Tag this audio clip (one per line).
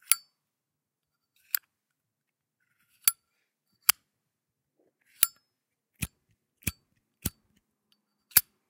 clipper
lighter